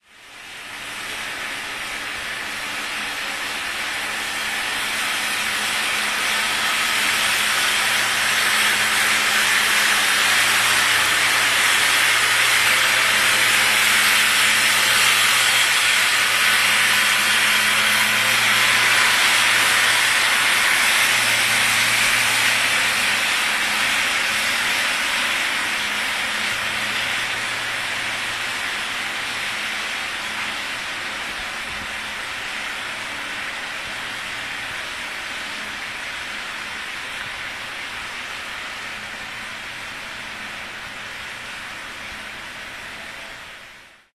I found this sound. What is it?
22.07.2010: about 2.20 at night. the noise produced by the washing car on the Gorna Wilda street in Poznan. the sound recorded by my bedroom's window.